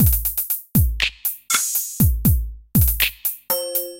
CWD F loop alone
cosmos, drums, electronic, idm, loop, percussion, science-fiction, sfx, space, techno